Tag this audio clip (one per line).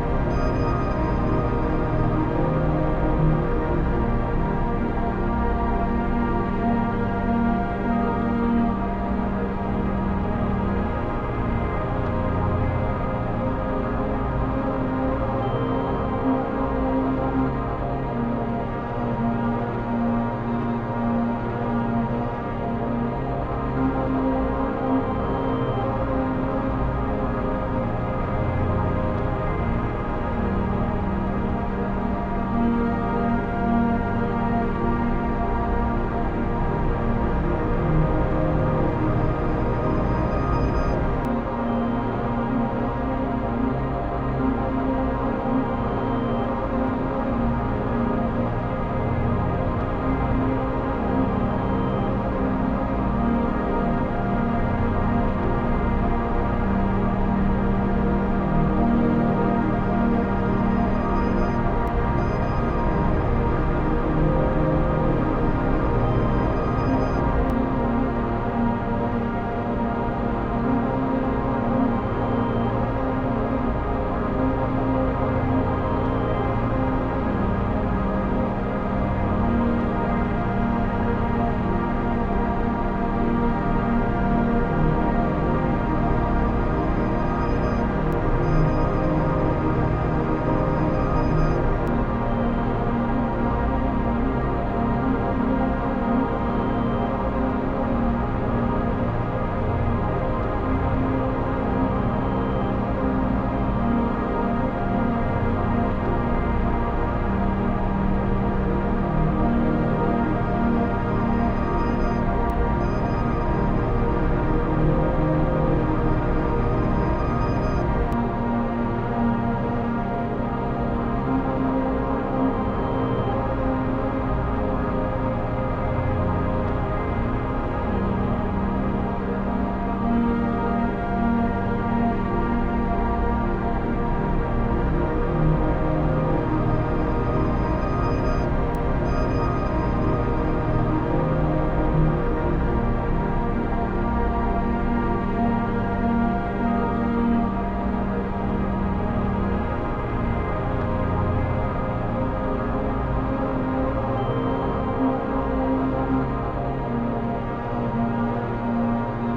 atmosphere
ethereal
familiar
muffled
warped